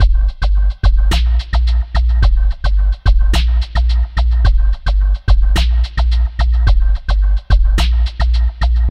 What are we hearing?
Sounds better downloaded. Remember to rate and follow for more ^_^